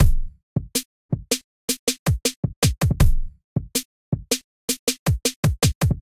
80´s inspired breakbeat 2 bars, 80bpm.
80-bpm, Breakbeat, Chill, Drumloop, Drums, Electro, Loop